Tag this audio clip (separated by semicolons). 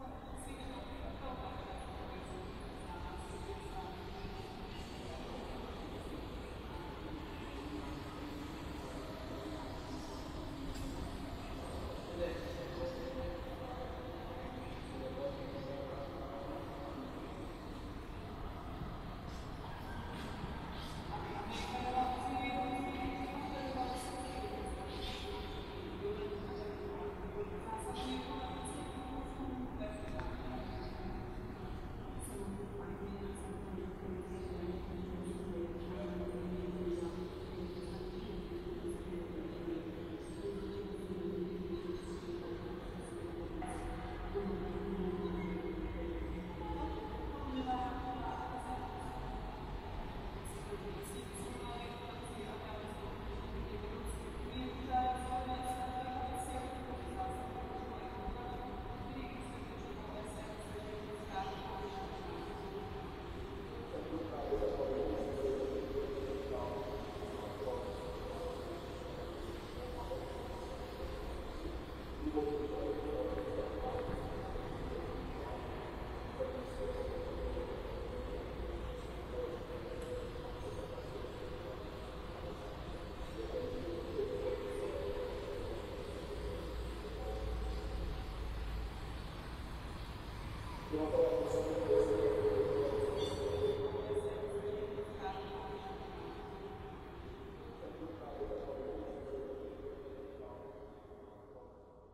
ambience; ambient; atmosphere; broadcast; city; distant; dream; drone; echo; field-recording; noise; radio; sci-fi; soundscape; tv; urban; voice